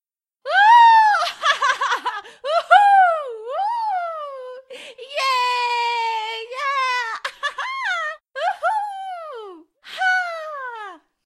AS055237 yeah
voice of user AS055237
elate, encourage, exhilarate, female, hearten, human, incite, uplift, vocal, voice, woman, wordless, yeah